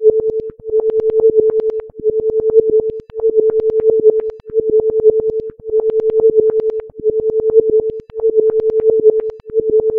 I generated a sine wave with audacity, and applied the wahwah and phaser effects multiple times with different settings. I don't know what I would use this for, but it's fun to listen to.
beep, beeps, clicking, fun, tone